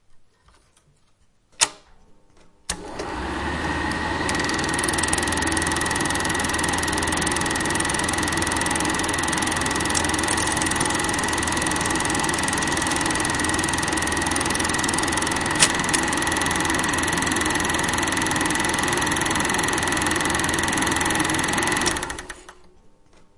Super 8 mm projector on-and-off
Sound recording of a real super8 mm projector starting, turning it on and off
projector, rhythm, reel, s8, silent-film, clean, project